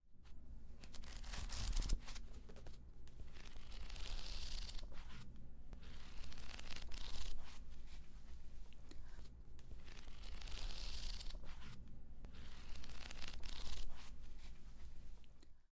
Viento, aire, ventisca
aire, ventisca, Viento